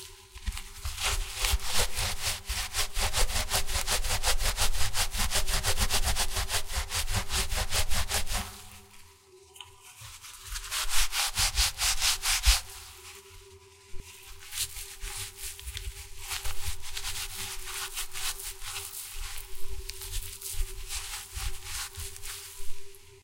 dry rub
Rubbing a mostly dry dishcloth back and forth.
cloth, dishrag, dry, fabric, friction, grind, rag, rub, rubbing